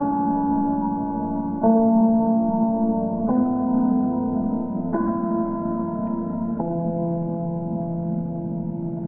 Recorded different signals from my cellphone Edited. ZOOM H1.